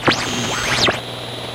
Sweeping the shortwave dial -- noise & tones filtered by changes in radio frequency.